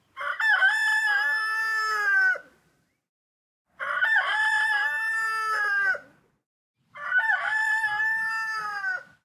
Rooster CsG
call, cock, country, morning, rooster, rural, side, village